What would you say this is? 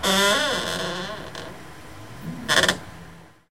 creak window
The window at my work creaks real bad. Try downpitching this sound a lot, that's more fun. Recorded with Zoom H4n
close, window